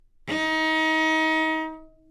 Part of the Good-sounds dataset of monophonic instrumental sounds.
instrument::cello
note::D#
octave::4
midi note::51
good-sounds-id::4598